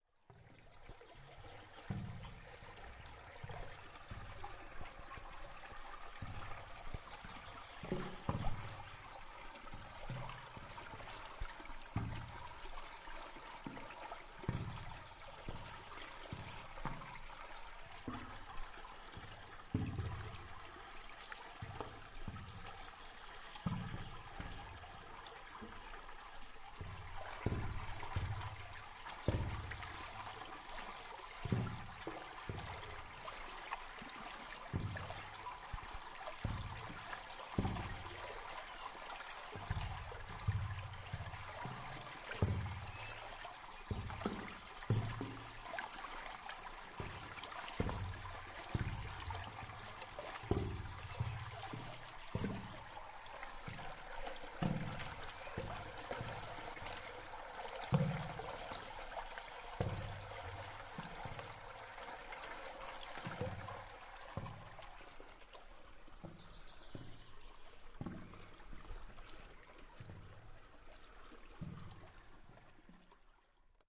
way timpano
pelion greece "field recording" forest "water flow"
flow, water